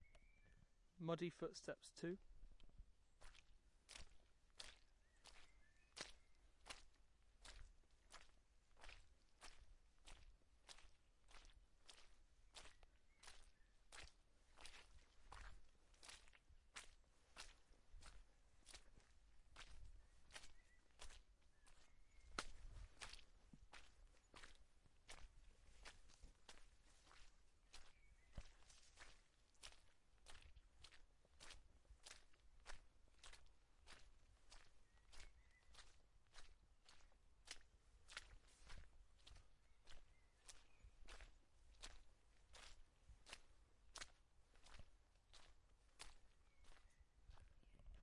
I recorded these footsteps with a ZoomH1N handy recorder. It was recorded on a peat bog nature reserve after a lot of rain.
steps, squish, natural, walking, step, mud, hike, walk, zoomh1n, ground, foot, feet, folly, run, running, footstep, unedited, muddy, dirt, underfoot, footsteps, wet, puddle